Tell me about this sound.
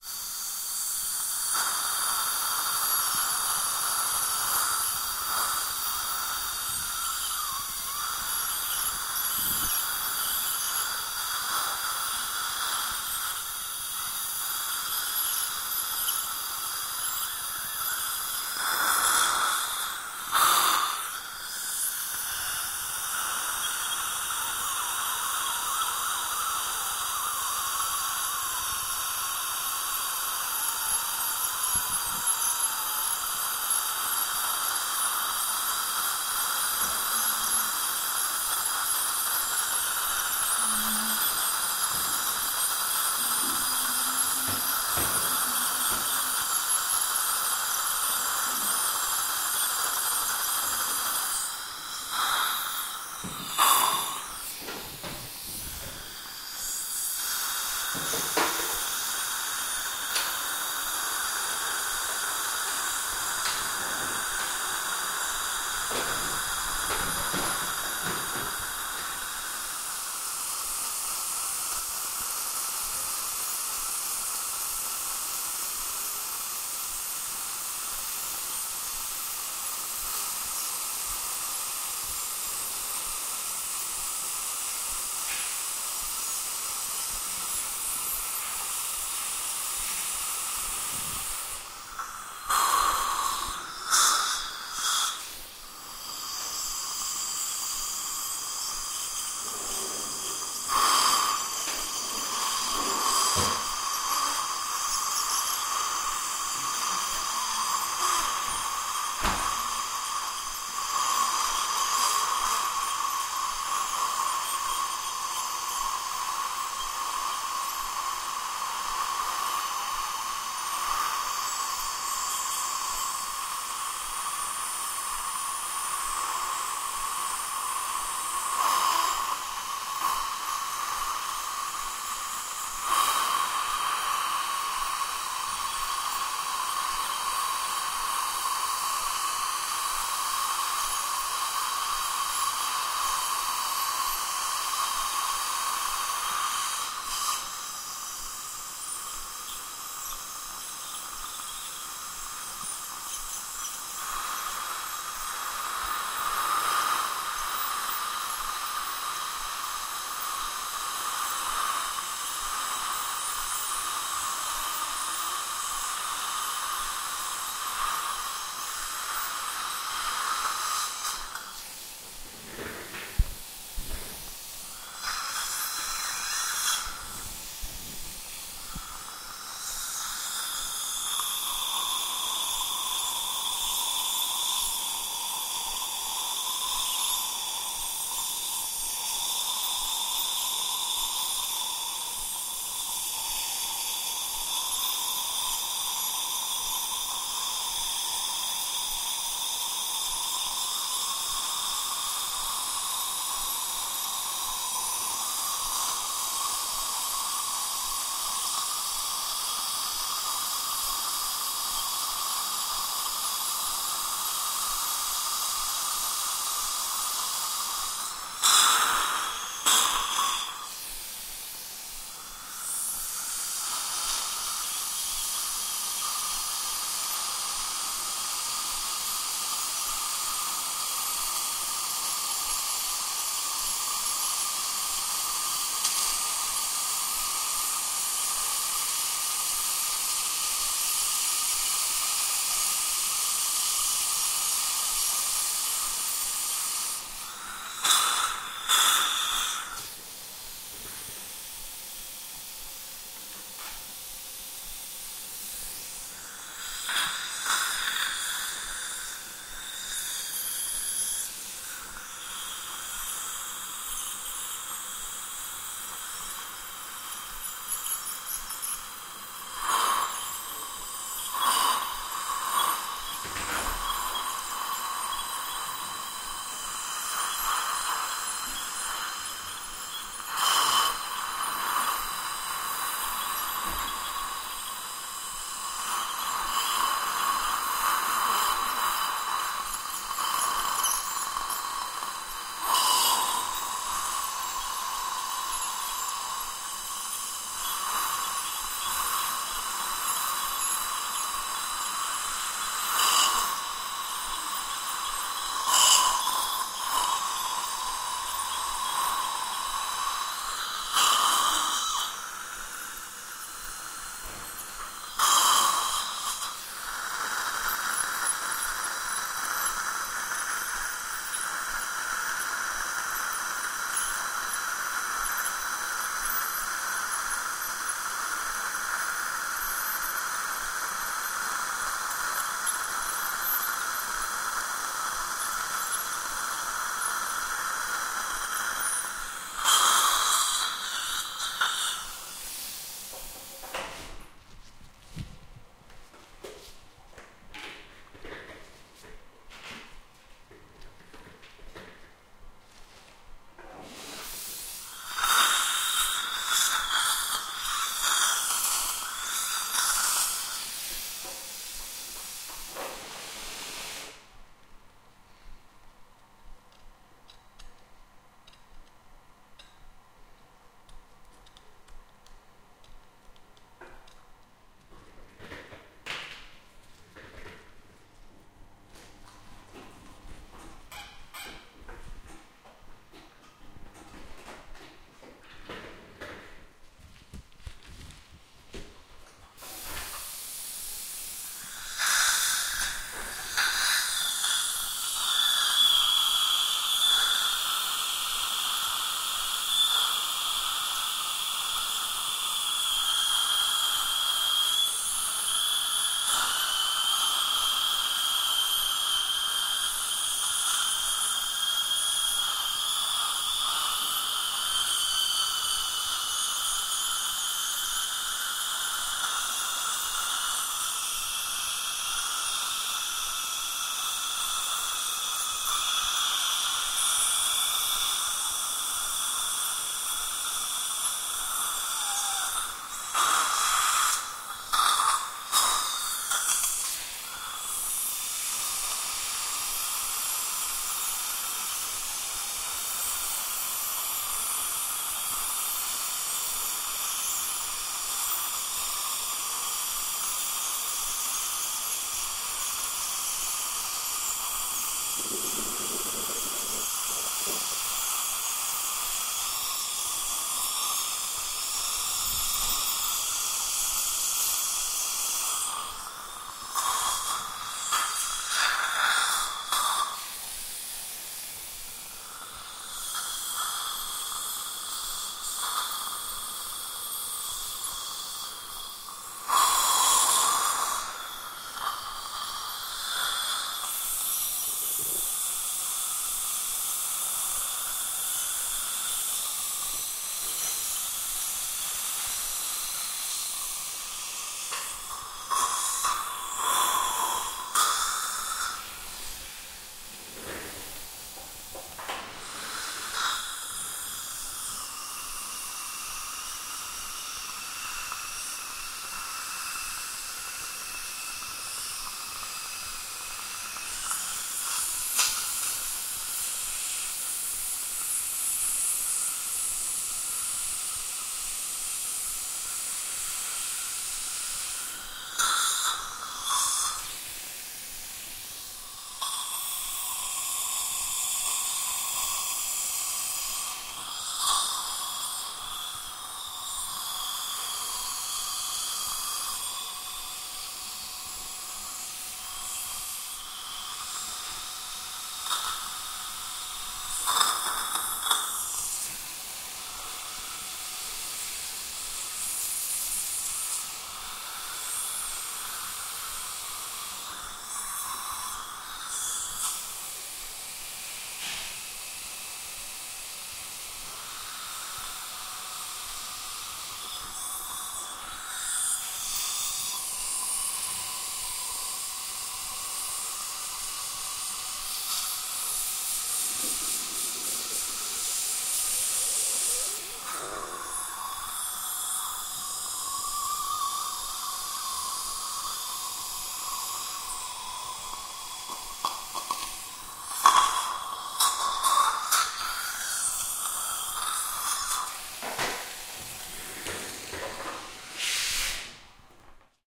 I asked my dental hygienist if I could recorder her cleaning my teeth.
I didn't manage to record the scraping tool (that hook), but I did record:
1. the cleaning tool (vibrating, high to very high pitches)
2. the polishing tool (spinning?, not so loud I think)
3. that thing that constantly sucks away saliva.
noise, pump, cleaning, saliva, machines, dentist, machine, noisy, compressor, dental, pumping, sucking, suction, dental-hygienist, teeth, buzzing, sucktion, liquid, tool, noisey, high-pitched, spinning, high-pitch, scratching, screeching, mouth